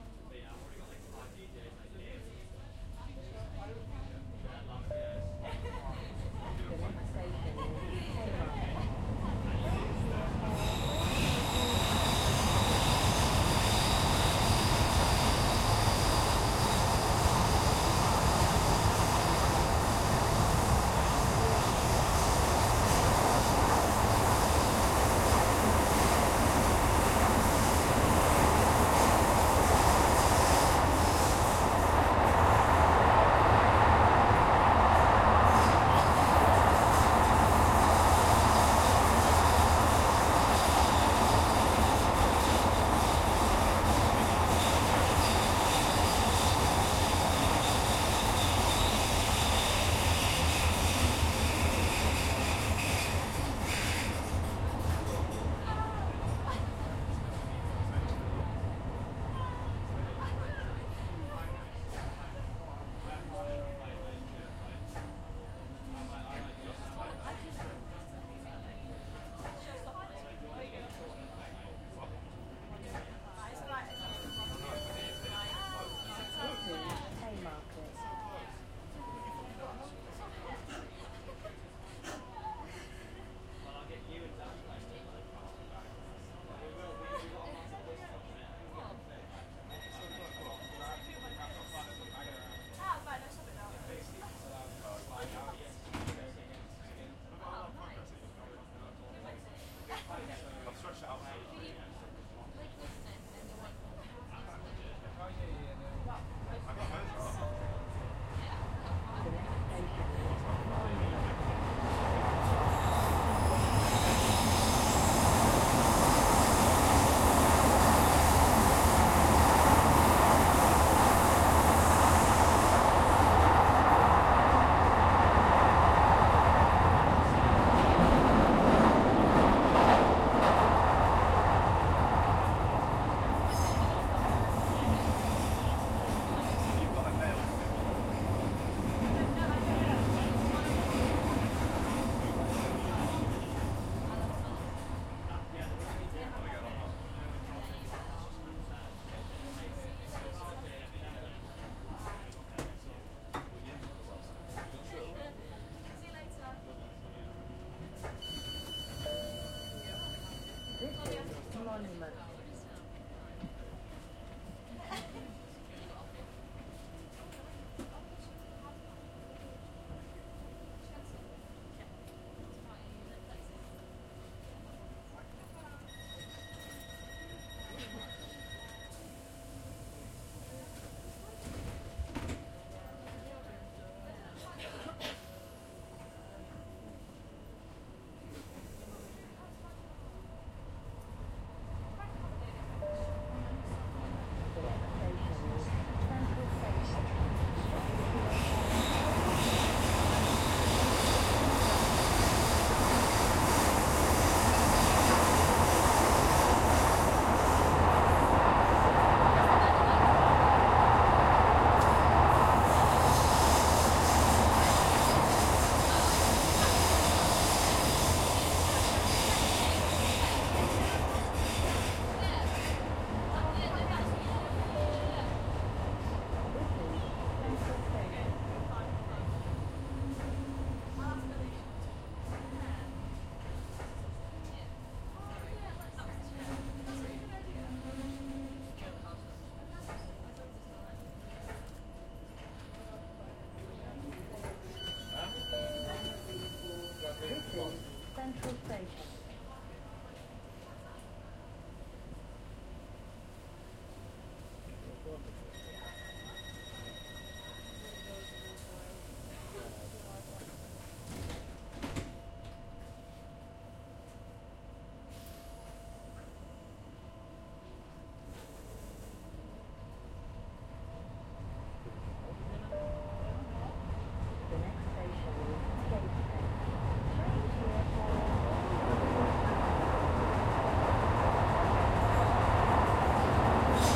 interior underground subway metro train several stations
interior, station, train, tunnel, light-rail, arrives, travel, travelling, underground, arrive, departing, departs, arrival, leaves, leaving, subway, field-recording, metro